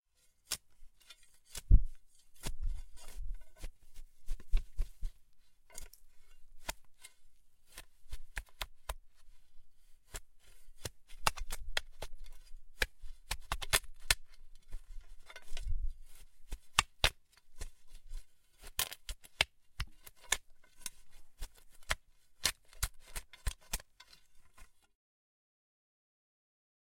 11. Digging with a spade
Spade, sand, outside, close